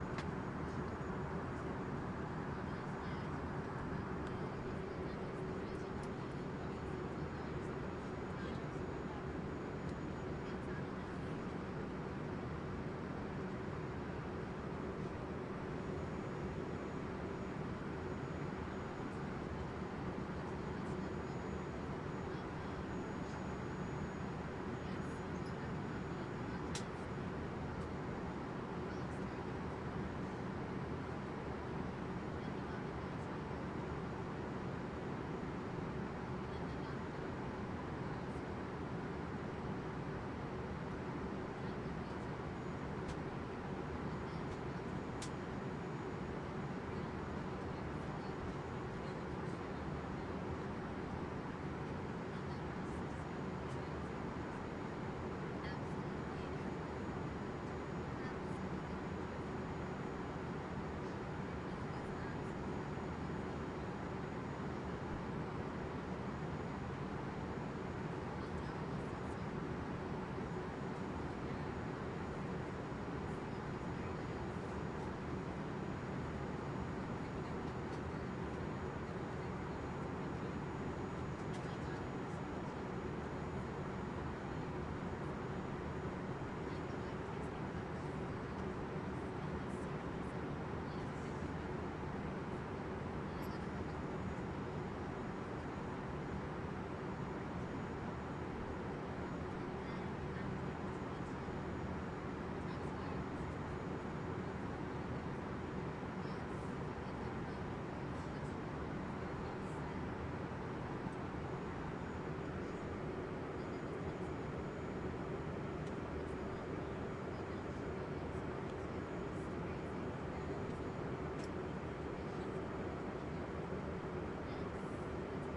rear ST int idling plane amb english voice
airplane, idle, plane, quad, surround